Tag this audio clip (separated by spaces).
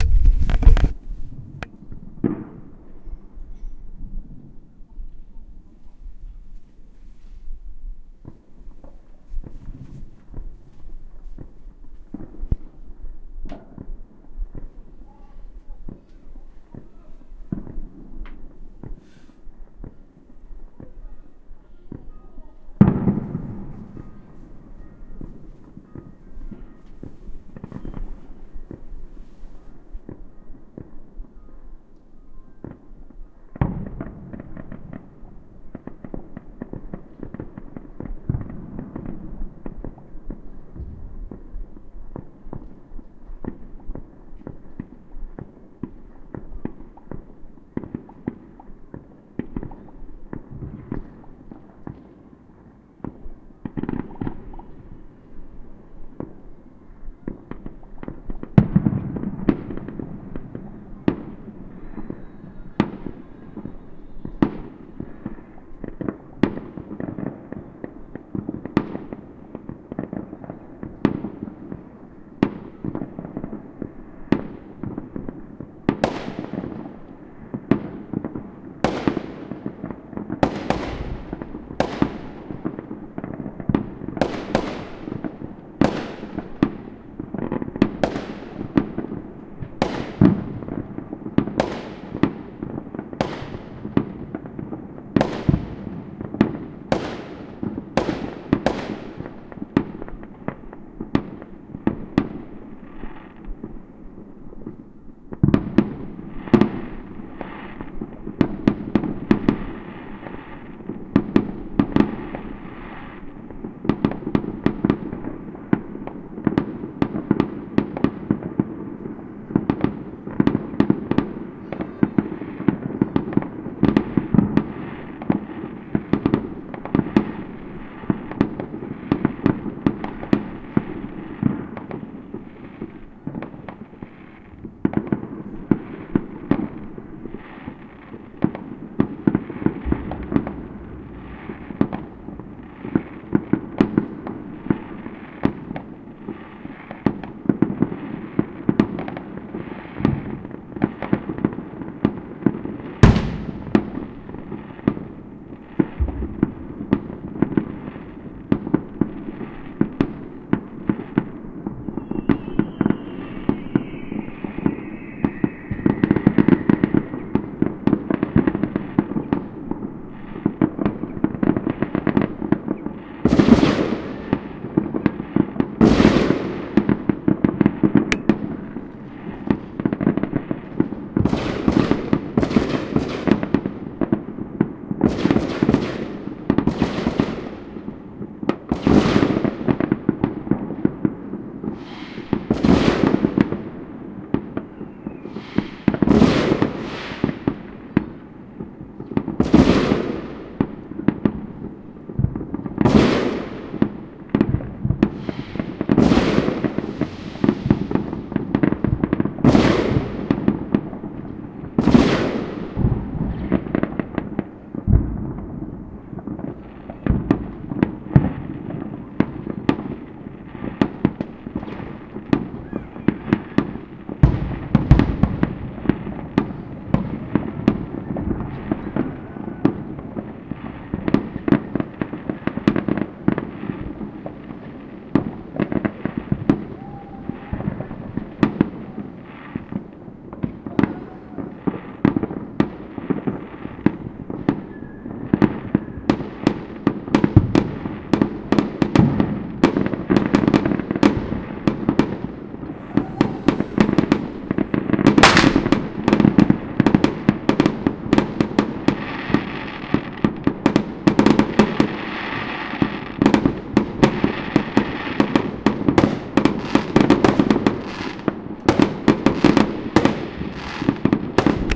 atmosphere bang celebration explosions firework fireworks new-year newyear pyrotechnics